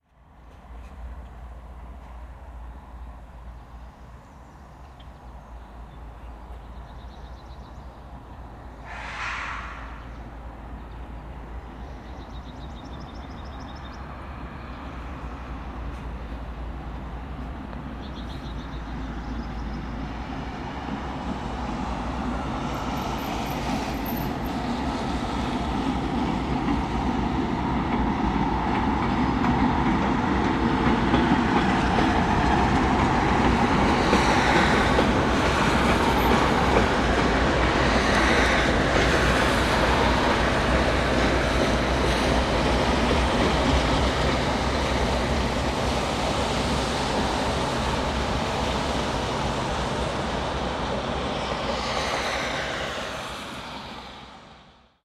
Small Train
Couldn't record too much of it unfortunately, but I got a hiss sound from the train coming down the rail. It was only the front and back part, nothing in between. That's why it's so short. Hope it's ok
Sony Cybershot Camera